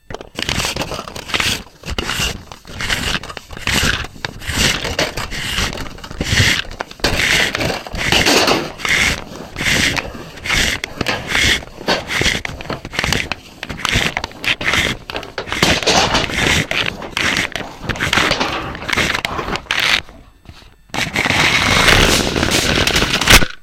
Pulling out the spring loaded 5 meters length scale steel roller from its plastic housing and then let it rush back with high speed and a lot of noise.....rattle, rattle.